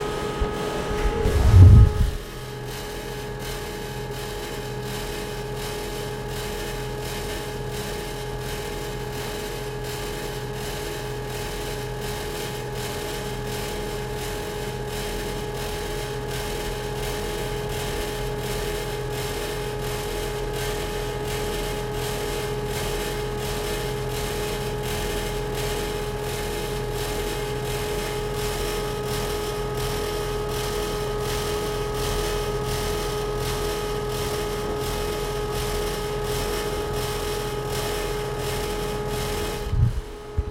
Fan Melbourne Central Subwsy Toilet
Field-Recording, Station, Train